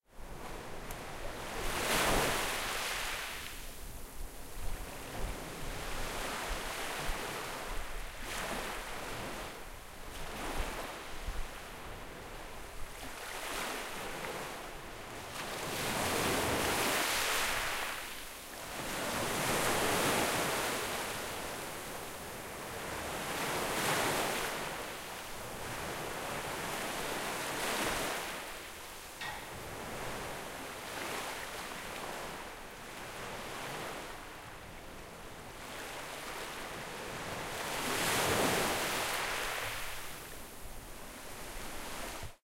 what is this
Southsea shore. Recorded with Zoom H4n
lapping, water